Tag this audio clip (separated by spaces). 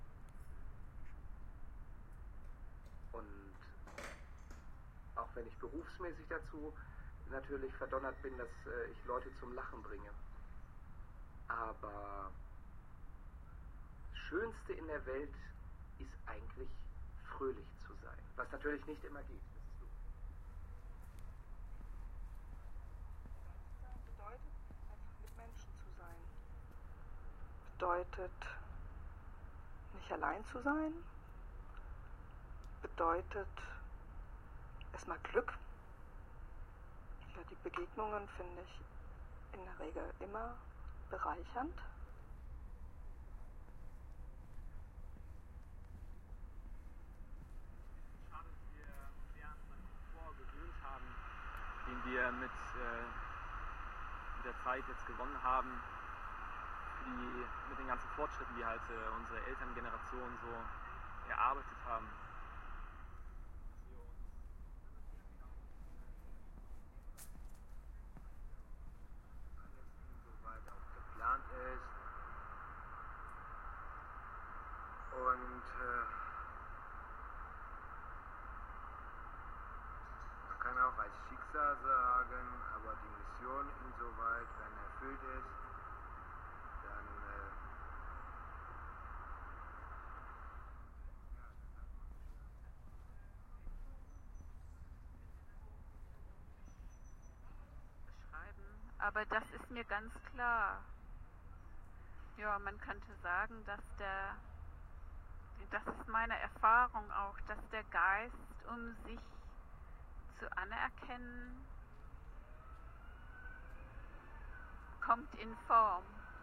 field-recording german meaning-of-life germany ort-des-treffens hanover soundscape